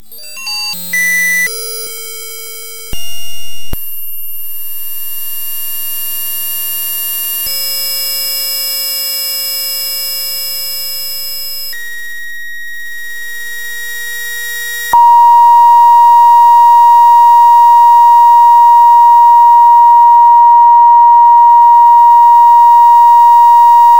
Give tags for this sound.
futuristic machine startup

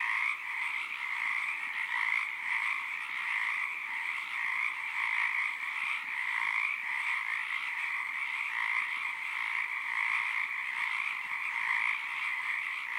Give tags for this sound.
croaking frogs marsh nature pond swap

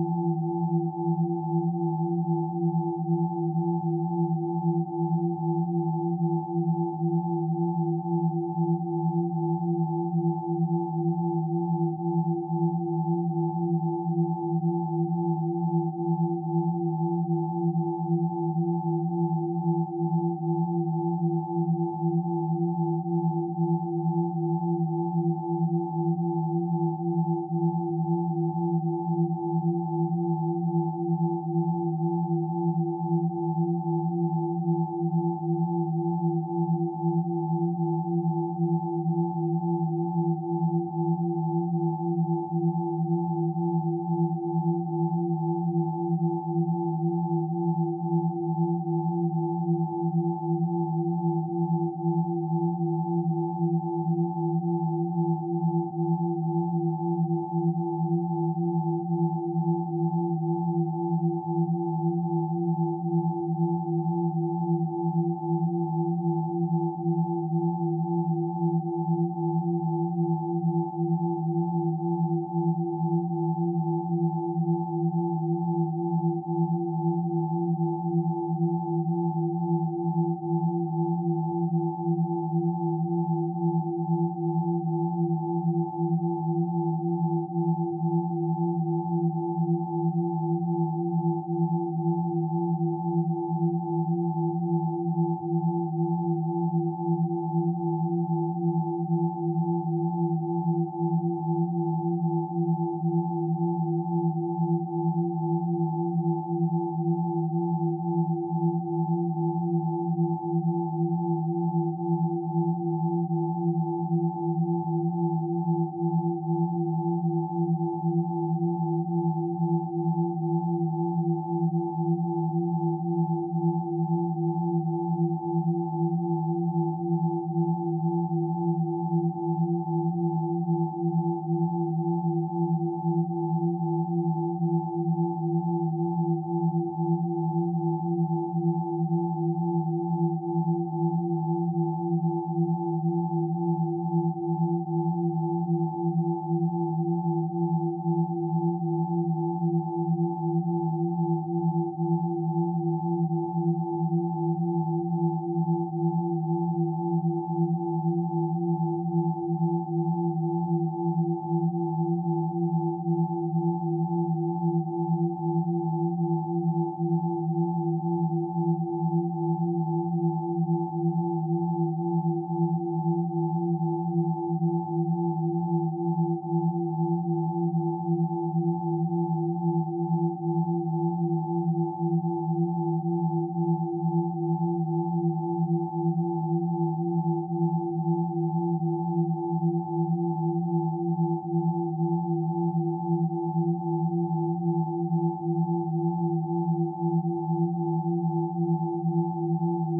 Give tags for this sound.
ambient background electronic experimental pythagorean sweet

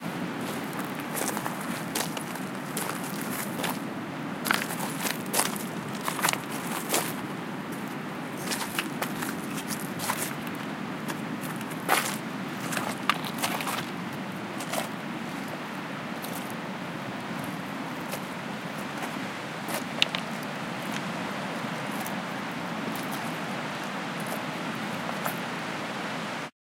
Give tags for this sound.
Rocks Ocean Sea Wales Waves Beach Field-Recording Outdoors Ambience Water Pebbles Footsteps Atmosphere

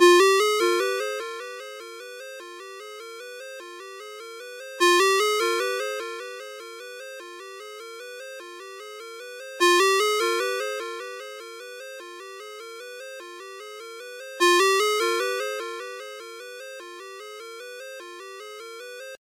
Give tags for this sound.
alerts mojomills cell-phone phone up6 ring-tone cell ring cellphone alert mojo ringtone alarm

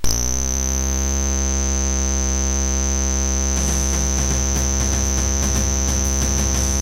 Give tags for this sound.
ambeint electro slightly-messed-with circuit-bent circuits noise glitch static-crush

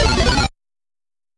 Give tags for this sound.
FX Gameaudio SFX Sounds effects indiegame sound-desing

